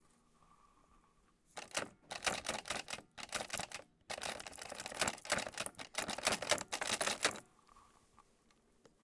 A metal door handle being jiggled frantically.